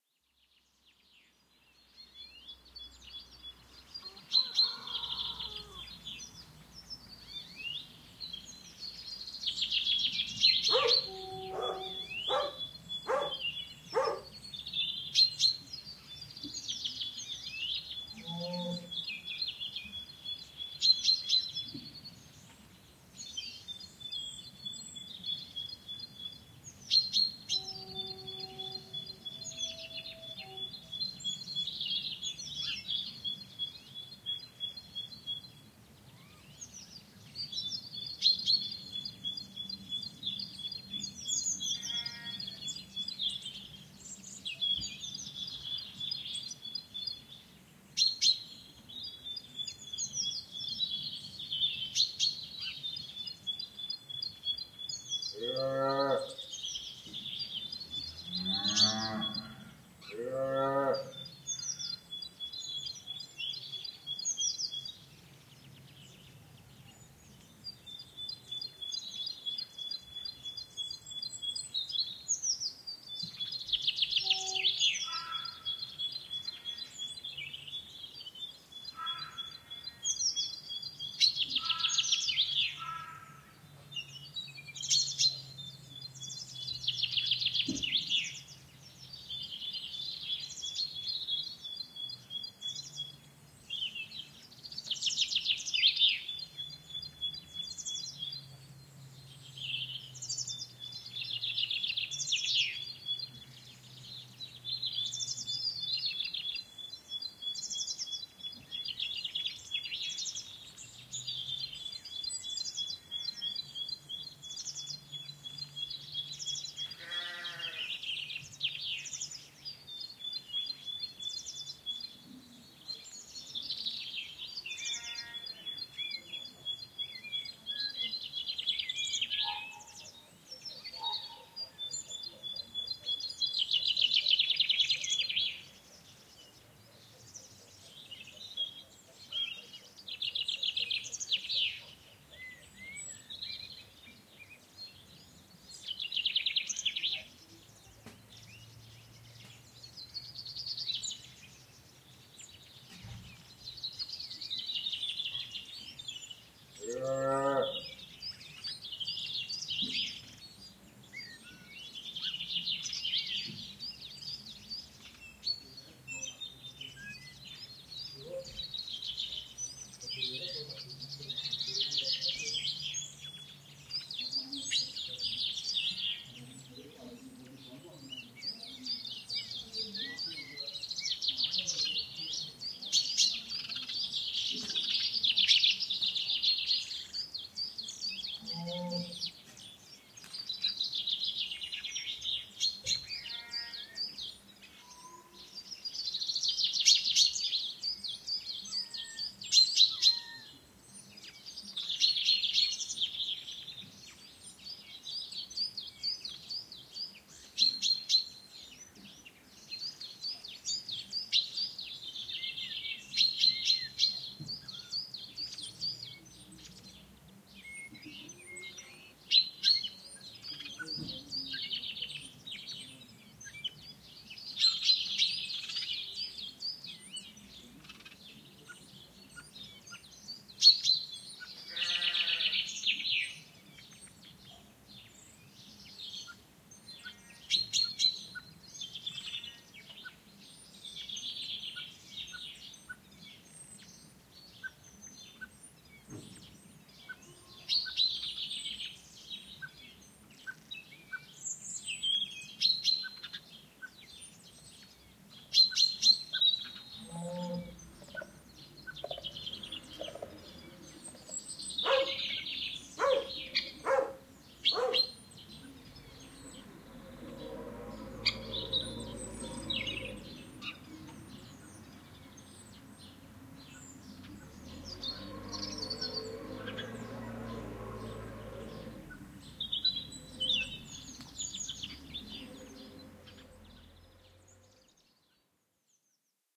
Farmyard Ambience Revisited
This one has added animals. Rode NT4 > FEL battery pre amp > Zoom H2 line in.
birds, bucket, calf, cockerel, countryside, cow, dog, farm, rooster, rural, sheep, sheepdog, stereo, tractor, voices, xy